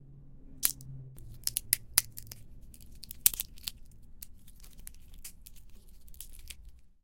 Golpe, madera, caer
caer, madera, Golpe